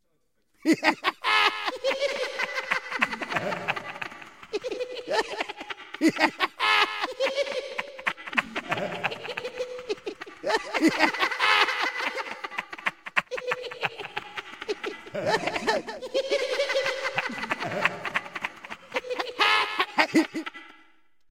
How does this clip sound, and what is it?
annoying creatures laughing
laughing, annoying, fantasy